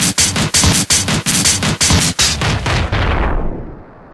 Several breakbeats I made using sliced samples of Cyberia's breaks. Mostly cut&paste in Audacity, so I'm not sure of the bpm, but I normally ignore that anyways... Processed with overdrive, chebyshev, and various other distortionate effects, and compressed. I'm somewhat new to making drum breaks, I'm used to making loops, so tell me how I'm doing!